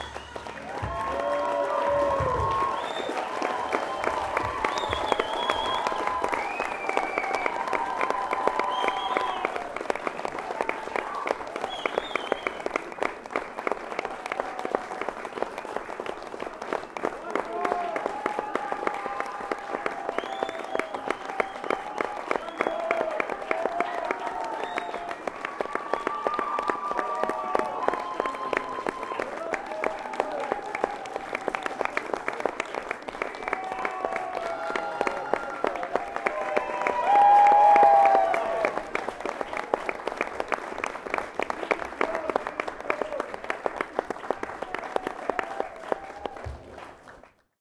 A stereo recording of enthusiastic applause at a small venue. Zoom H2 front on-board mics.
applause, cheering, clapping, encore, more, ovation, stereo, ululation, whistling, whooping, xy